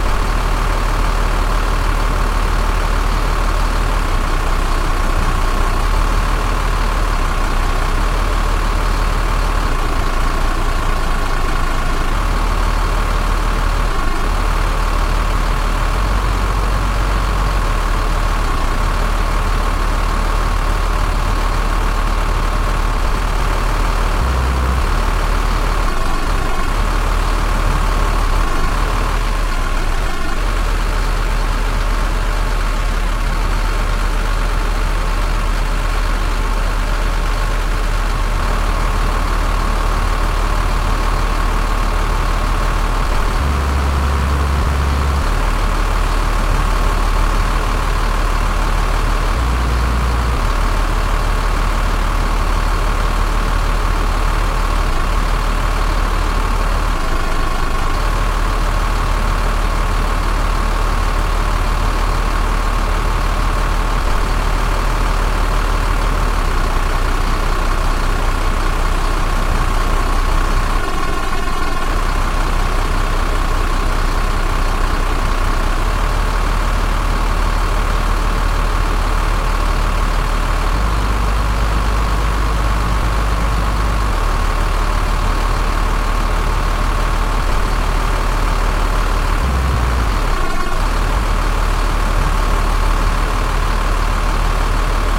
Diesel In Trouble
A simulation of a diesel engine with a faulty governor.
engine, searching, four-stroke, diesel-engine, faulty, diesel, faulty-governor